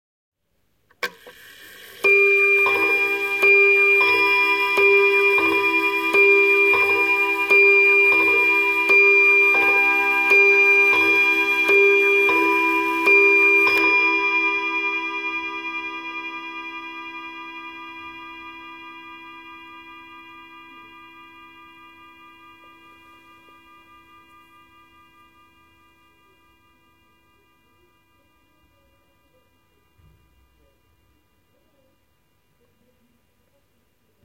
Antique table clock (probably early 20th century) chiming nine times.
o, pendulum, chimes, time, hour, clock, antique, nine